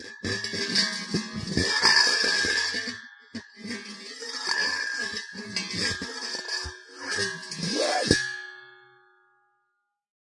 large metal bowl scraped with heavy metal juicer